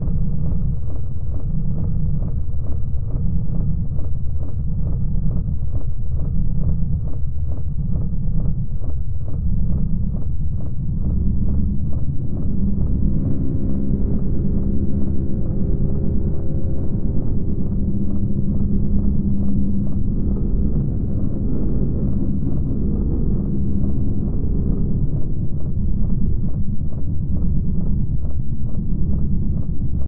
Underwater beat with resonance